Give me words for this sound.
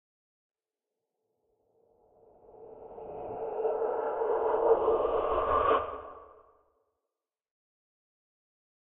Ambient Noise
A strange ambient sound which sort of sounds like a mix between breathing, whispering and ringing. I'm not entirely sure how I made it, but I think it might have been my voice directly recorded onto my laptop with some paulstretch and other effects added.
Created 12/03/2019
ambience, atmosphere, eerie, ambiance, background, horror